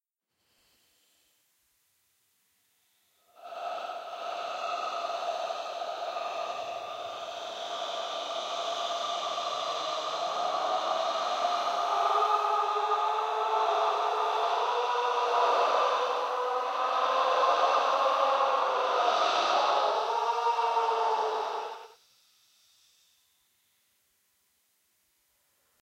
A loud, stretched out moan. Perfect for ambiance.
Sound recorded with a Turtle Beach PX22 headset, and edited with audacity.
Thanks!
horror, haunted, creepy, scary, ghost, ambiance